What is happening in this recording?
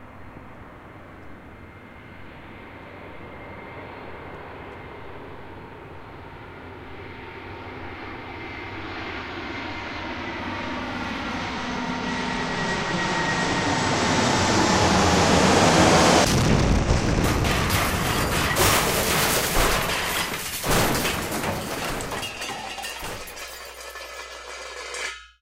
Working on an animation that has a plane crash.
Bits from: